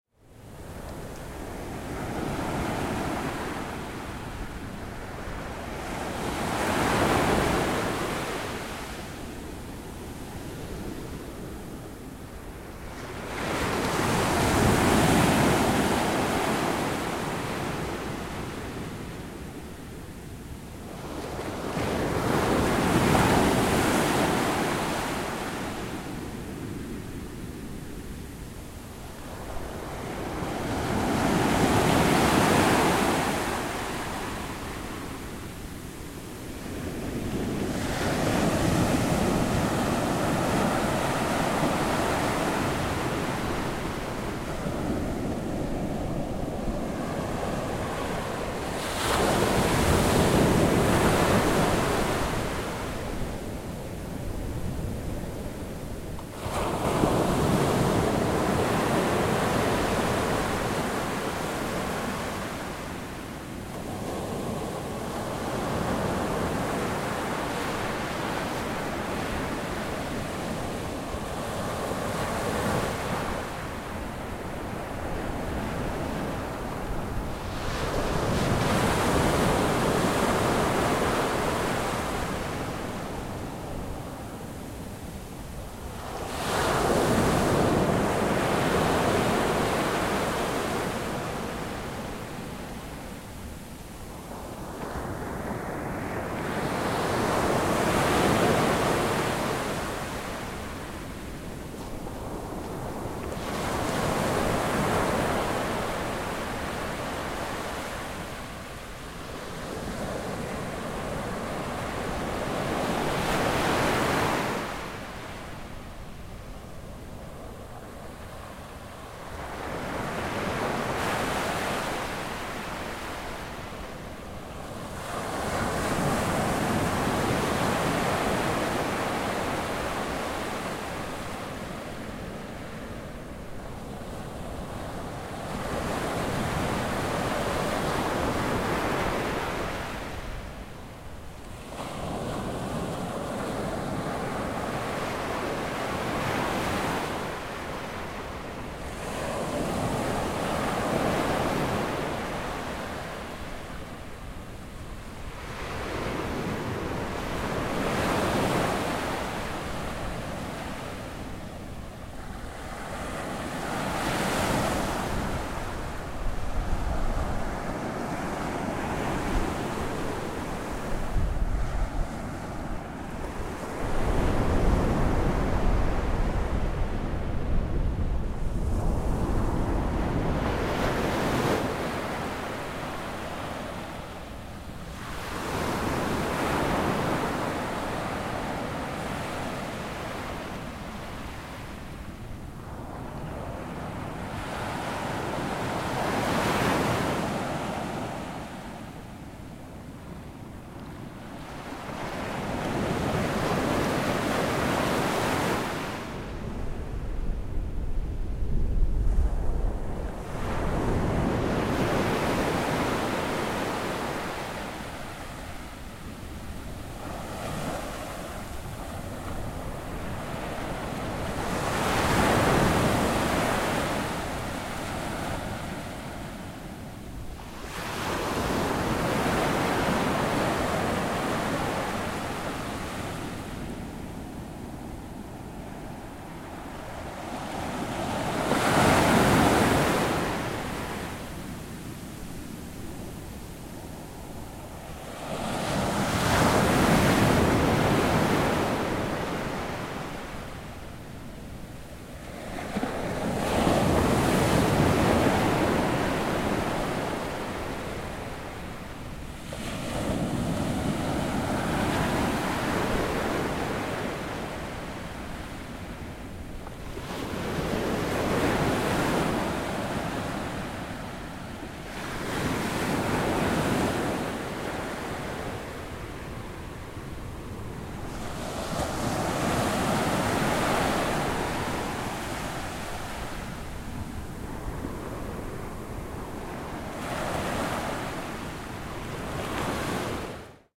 Coloane HacsaBeach 4 50
Hacsa Beach Coloane Macau